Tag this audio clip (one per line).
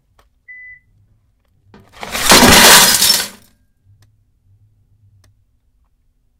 drop
gravel
metal